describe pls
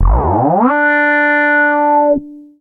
analog bass 04
Resonant analog synth scream played on vintage analogue synthesizer Roland JUNO106. No processing.
you can support me by sending me some money:
vintage, analog, tone, synth, synthesizer, electronic, bass, siren, alarm, retro, buzzer, scream, horn, resonant